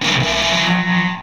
Some Djembe samples distorted
distorted, dark, distortion, noise, drone